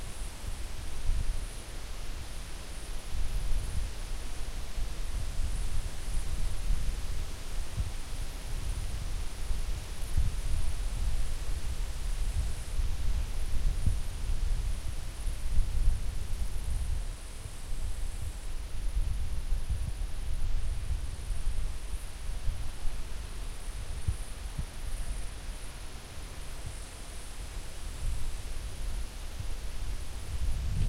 september forest wind
Forest sounds in september in Sudeten mountains
birds, forest, nature, wind